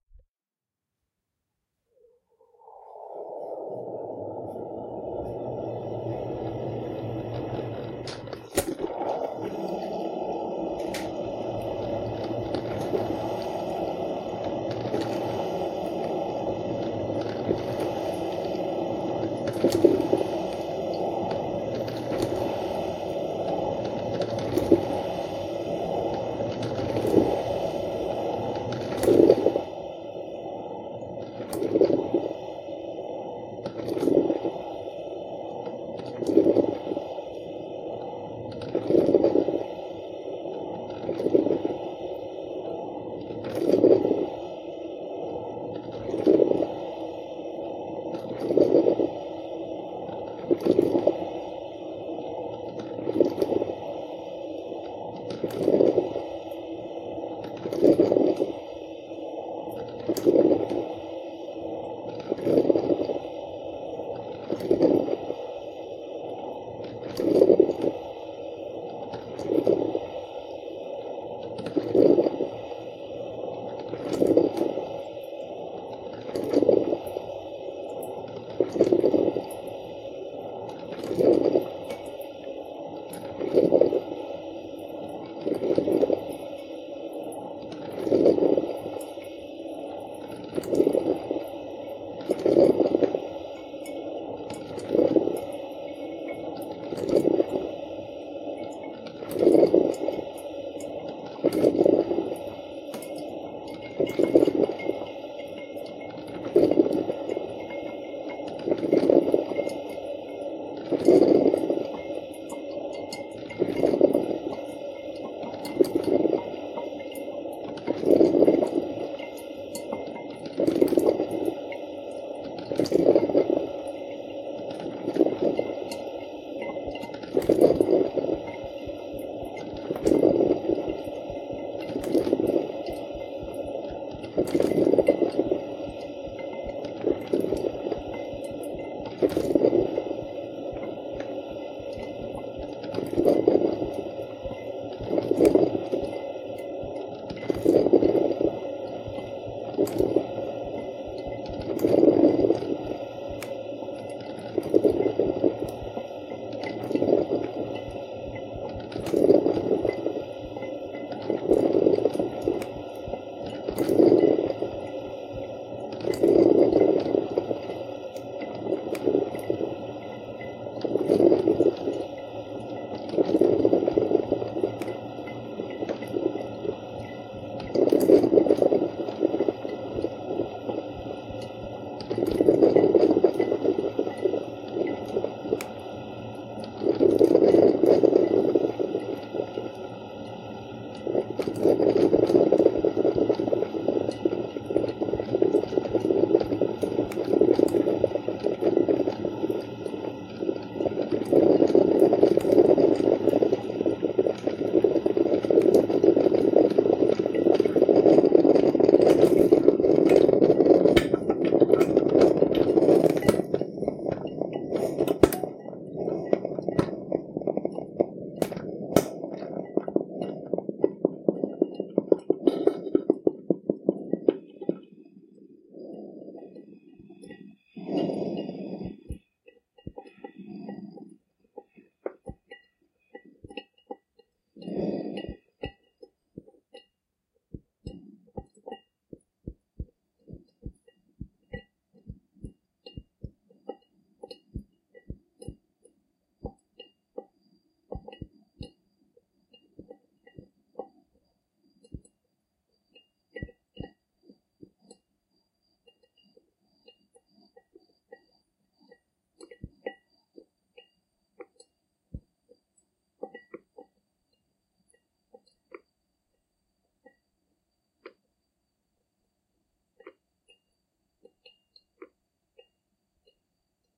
New coffee machine, new sound recording.
Recorded from a filter coffee machine, the kind with a jug/ carafe.